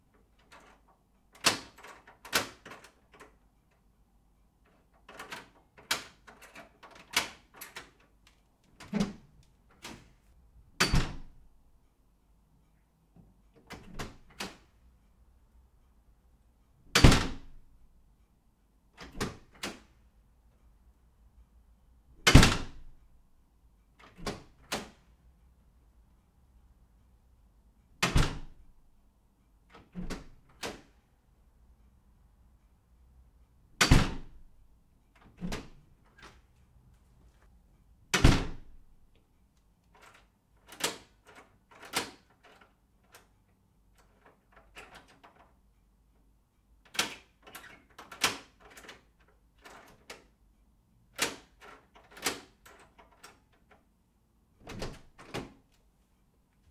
Door Open and Close, Lock
Closing, door, Flat, lock, locking, Metal, Opening, unlocking